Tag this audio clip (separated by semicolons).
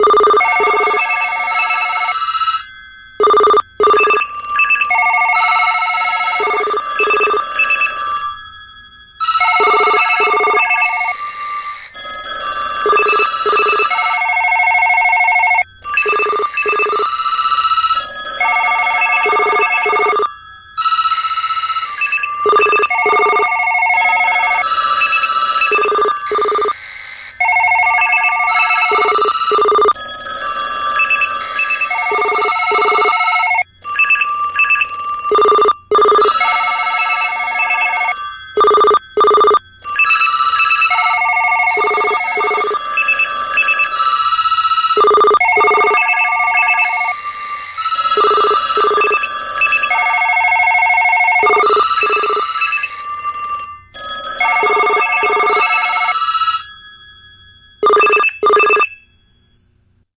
ringing; telethon